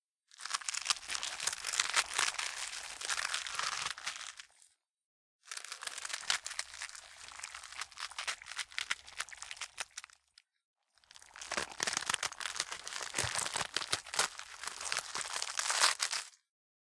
Gore Cabbage
Cabbage sound being squished, twisted and ripped.
Recorded and performed by students of the Animation and Video Games career from the National School of Arts of Uruguay, generation 2021, during the Sound Design Workshop.
Gear:
Oktava MK-12
Zoom H4n
Format:
digest, crunch, flesh, squash, squish, guts, gush, egg, cabbage, cocoon, splat, splatter, monster, bones, eat, gore, blood